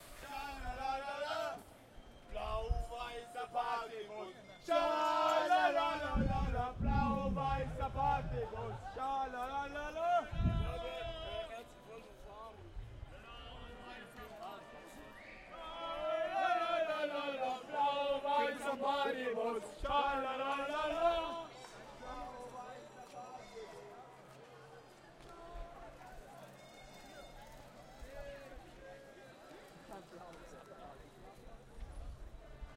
em-footbalfans by nm
soccer fans in munich during the em 2008 after a german success
fan, recording, field, soccer, em, german